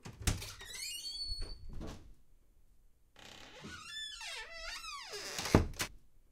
Open then close squeaky door
A brief opening and then closing of a squeaky wooden door. Studio recording.
squeaky, thunk, wooden